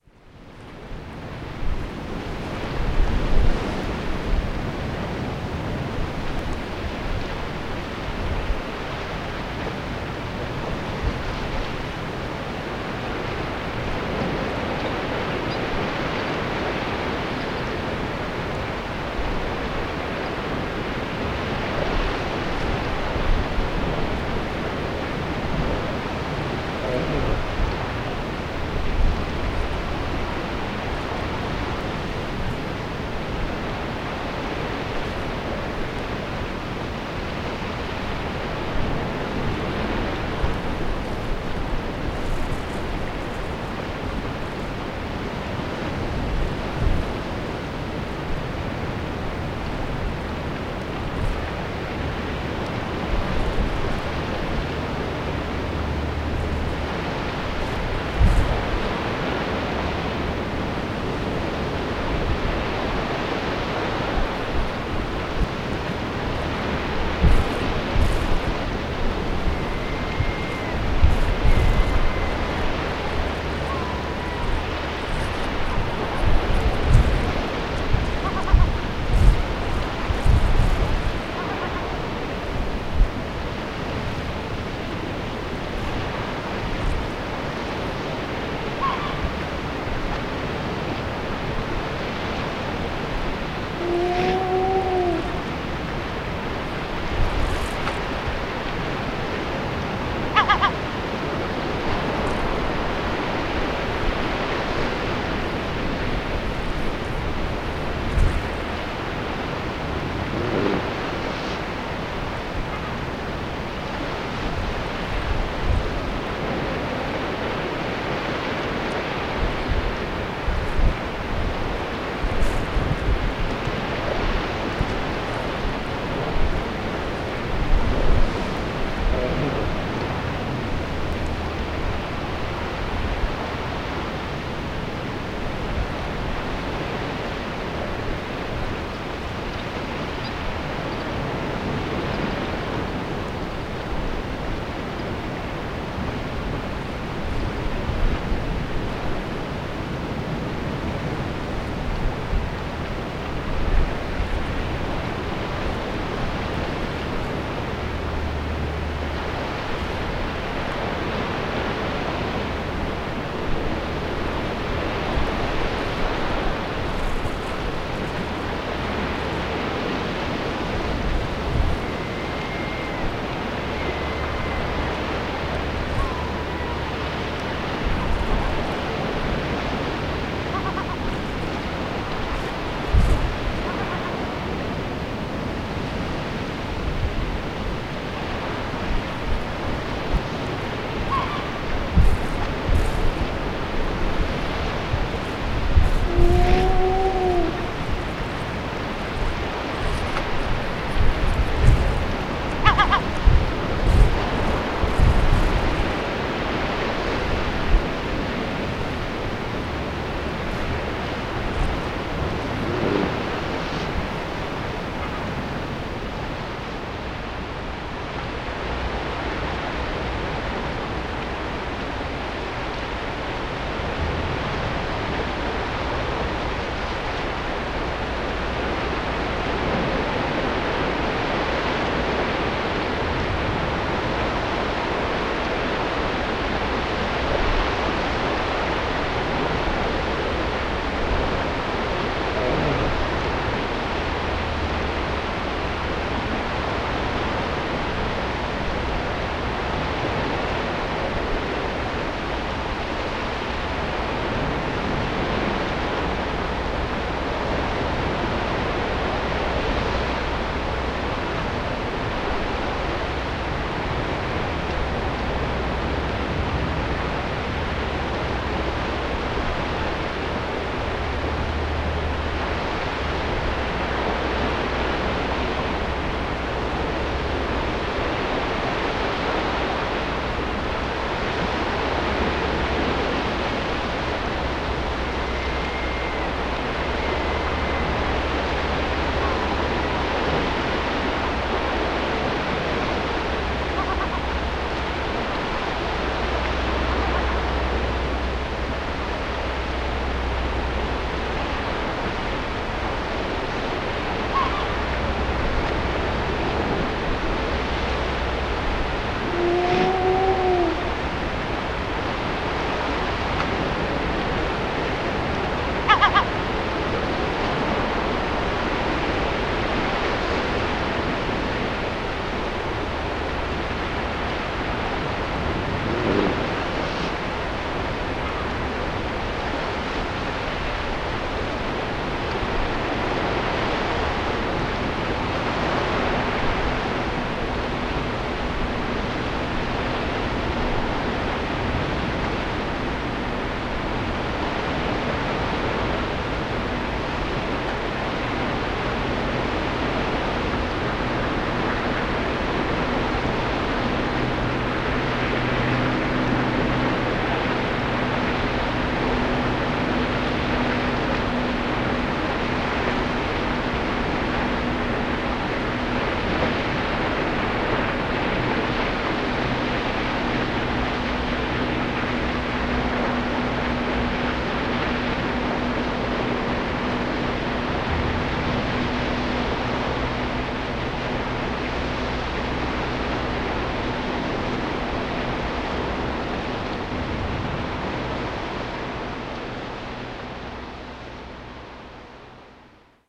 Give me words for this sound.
05.Seal-Bay
Recording of seals grunting and howling in a bay. Recorded from the top of a cliff in Pembrokshire.
sea-cliff, field-recording, seals, seal-howl, sea